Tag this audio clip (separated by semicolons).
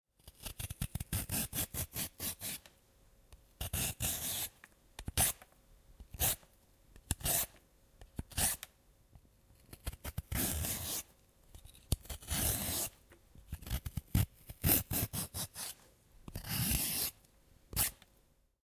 carrot; cook; cooking; cuisine; cutting; eat; eplucher; eplucheur; food; kitchen; knife; legumes; nourriture; organic; peeler; peeling; slicing; vegetable; vegetables